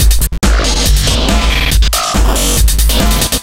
Thank you, enjoy